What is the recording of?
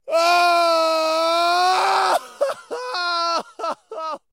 Sad cry 6
Just so sad about something.
Recorded with Zoom H4n
acting, agony, anguish, clamor, cries, cry, distress, emotional, grief, heartache, heartbreak, howling, human, loud, male, pain, sadness, scream, screech, shout, sorrow, squall, squawk, ululate, vocal, voice, wailing, weep, yell